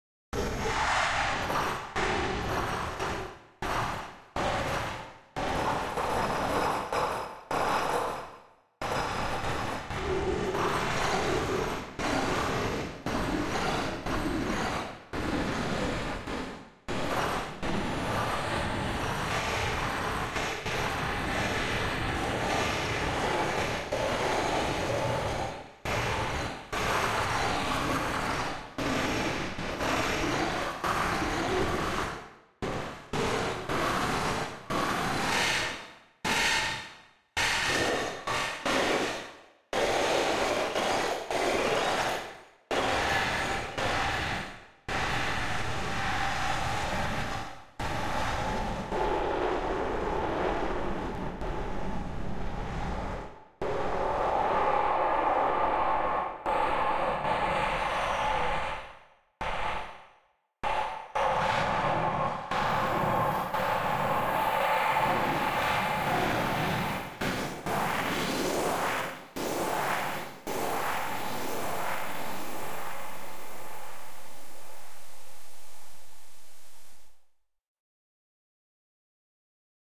small room for interpretation
I used Ableton's:
- Grain Delay (Spray: 80ms, Frequ: 43 Hz, rndPitch: 3.19, Fdb: 65, Wet: 70%)
- Compressor with very slow Release as kind of an Amp-Follower
- Carefully adjusted Gate with 111ms Release
- very short SimpleDelay
- Overdrive
- Short Reverb
Original Sample:
atmo, continuum-1, drive, electronic, overdrive, percussive